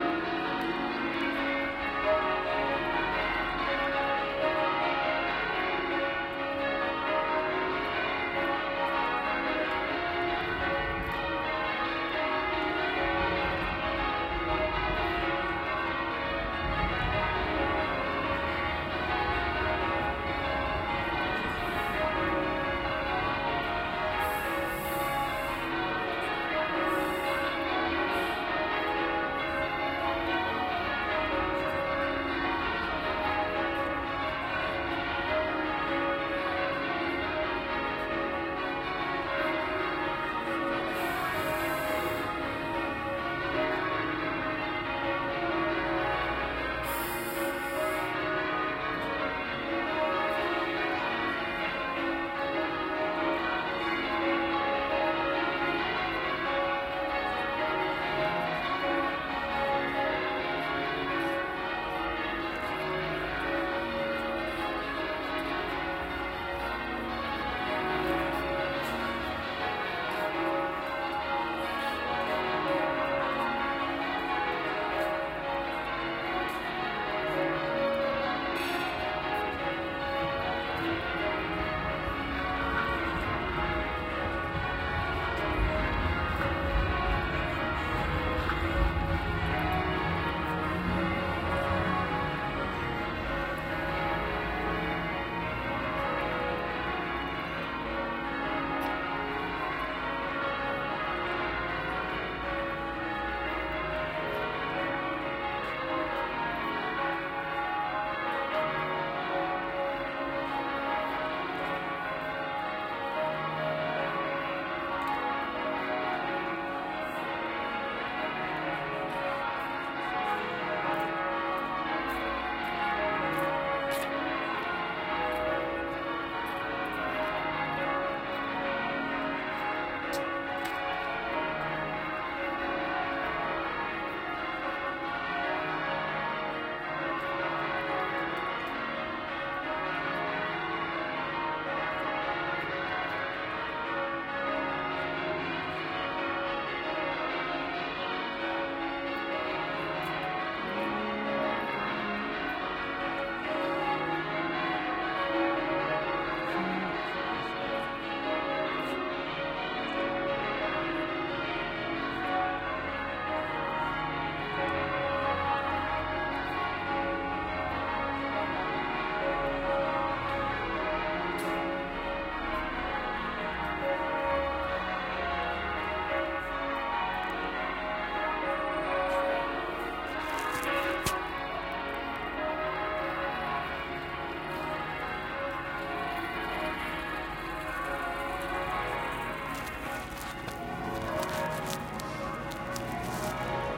Cathedral bells -binaural, 3 minutes
Binaural* recording of Cathedral bells repeating over and over (not looped). Towards the end of the file the bells are heard from a distance (the recording continued while walking away).
Recording Notes:
1. Recorded in the field using Soundman Okm II binaural microphones with the H1 Zoom recorder. Sunday 87/2/2015 mid afternoon.
2.The recording has been left unedited to allow the greatest amount of creative reworking.
3. Recordings are as long as possible for video usage (it's easier to shorten a sound than to expand it).
4. Volume in these series will be left unaltered to allow easier mixing as far as possible. You won't have distant birdsong louder than a closeup door slam.
Any concerns, send me a message.
*Binaural is a recording method that allows surround sound over stereo headphones but over speakers can add depth when mixed with other sounds.
360, 3d, 3d-sound, ambience, bells, binaural, cathedral, city, field-recording, wedding-bells